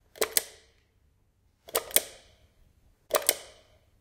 Rocker Spring Light Switch
Switching the timed lights on in a Berlin apartment building.
clicking, light-switch, off, click, switch, switching, switches, electricity, toggle, light, mechanical, push, button, electrical